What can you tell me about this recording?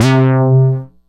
multi sample bass using bubblesound oscillator and dr octature filter
sample, bass, multi, synth